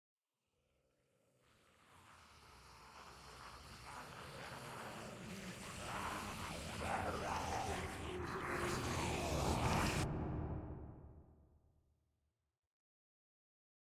Reverberant Zombies
Multiple people pretending to be zombies, reverb tail at end.
zombie, horror, group, voice, undead, dead-season, roar, snarl, solo, monster, ensemble